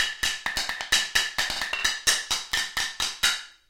IMPROV PERCS 135 2 BARS 130 BPM
Sources were placed on the studio floor and played with two regular drumsticks. A central AKG C414 in omni config through NPNG preamp was the closest mic. Two Josephson C617s through Millennia Media preamps captured the room ambience. Sources included water bottles, large vacuum cleaner pipes, wood offcuts, food containers and various other objects which were never meant to be used like this. All sources were recorded into Pro Tools through Frontier Design Group converters and large amounts of Beat Detective were employed to make something decent out of our terrible playing. Final processing was carried out in Cool Edit Pro. Recorded by Brady Leduc and myself at Pulsworks Audio Arts.
cleaner, beats, groovy, bottle, loop, lumber, funky, food, dance, drum-loop, container, metal, improvised, acoustic, drum, fast, loops, board, 130-bpm, hoover, beat, ambient, break, drums, garbage, industrial, music, breakbeat, beam, hard